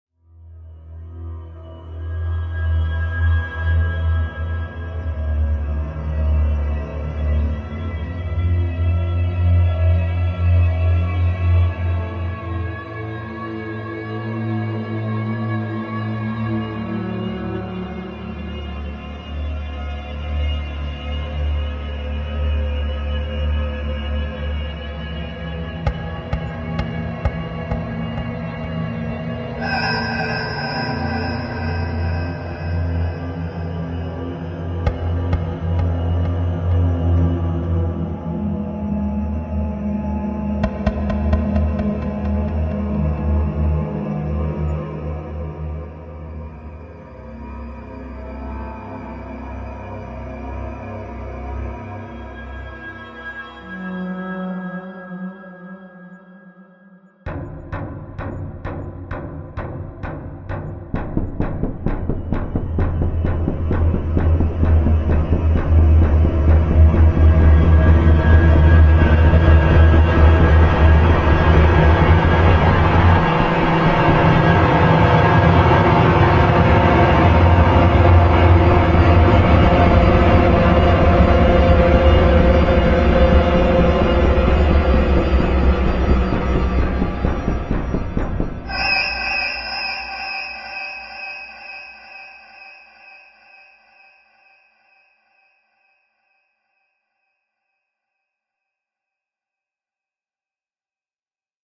Intense horror music 01
horror, horror-effects, horror-fx, Intense, scary